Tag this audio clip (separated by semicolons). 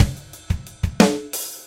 180bpm; kit; acoustic; polyrhythm; 4; jazz; loop; drum; 5